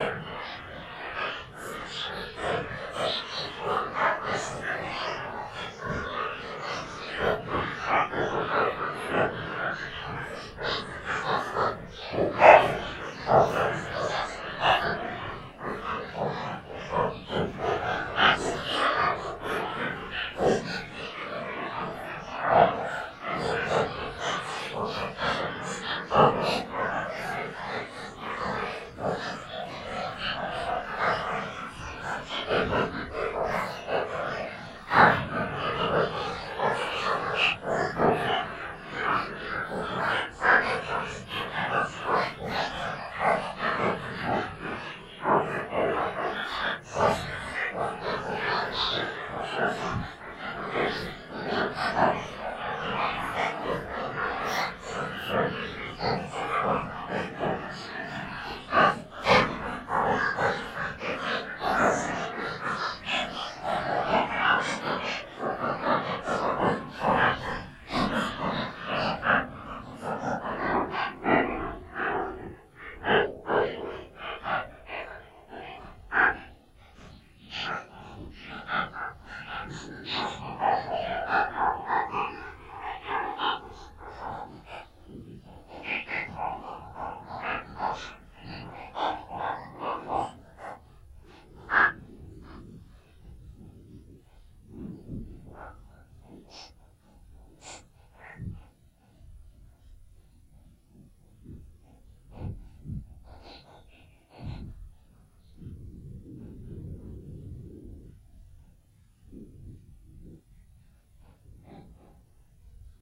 mosters of the abyss2 (PS)
Isn't Paulstrech a wonderfull program? Supernatural voices seem to emanate from beyond the grave in this sound stretched with Paulstretch.
breathy, eery, synthetic, air, ghost, wind, breath, streched-sounds, supernatural, paulstretch, synthetic-vocal, processed, wisper, evil, ethereal, scary, horror